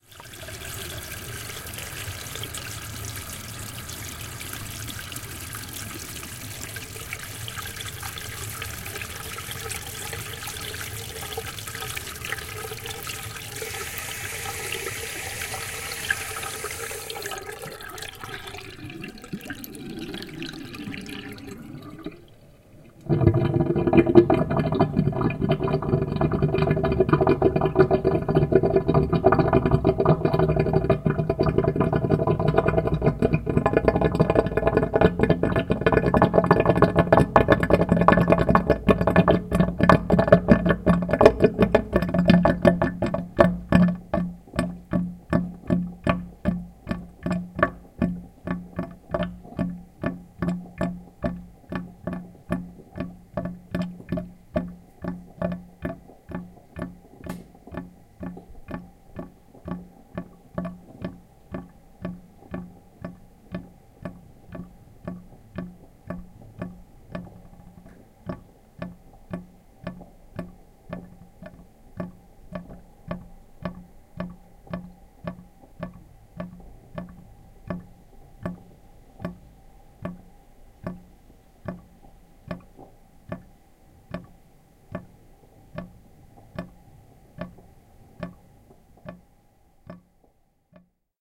Sink drain - Genzano
Audio recording of the discharge of a defective sink in an attic in the country. The gurgling sound is transformed into a slowing down of the chiming of water in the pipes, which seems almost no end...
Registrazione dello scarico difettoso di un lavandino in una soffitta del paese. Il gorgoglio si trasforma in un rallentando di rintocchi dell'acqua nelle tubature, che sembra quasi non finire...
water, drain